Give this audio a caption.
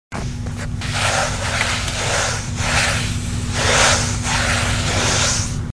Book Sounds - Rub
Rubbing a book with bare hands
Book, rub, rustle